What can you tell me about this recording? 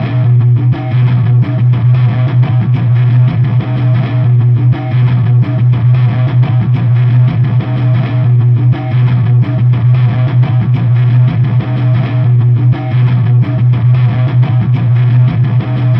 congo7guitar
tribal, guitar, acoustic, drum-loop, distortion, percussion-loop, noise, drums, improvised